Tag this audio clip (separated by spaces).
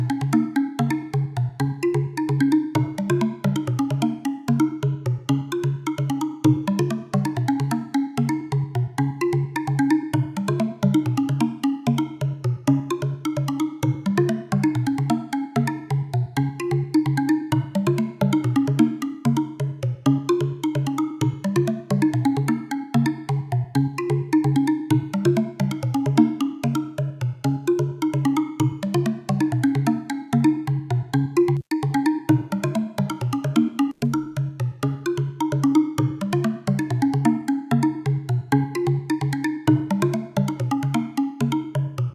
space drizzling nature jungle mysterious weather rain music intresting